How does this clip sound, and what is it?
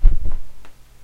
Some fight sounds I made...
combat, fight, fighting, fist, hit, kick, leg, punch